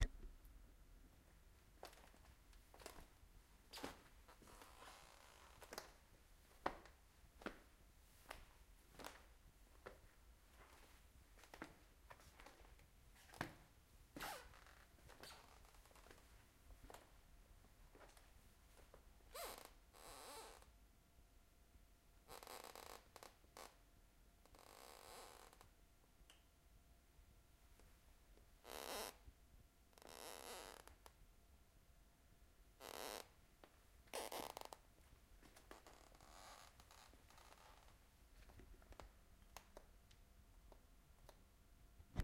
walking slowly on wooden floor 2